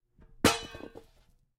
Metal tea tin being dropped onto carpet and making metal impact noise.